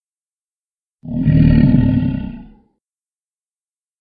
A lion growl (not real of course, but it sounds real!).
If you enjoyed the sound, please STAR, COMMENT, SPREAD THE WORD!🗣 It really helps!